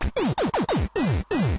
A circuit bent laser sound ripped from a recording session of a circuit bent laser gun for kids.
1/3 laser sounds from my circuit bent sample pack II.
electronic, electricity, bent, bender, laser, circuit, bending, glitch, tweaked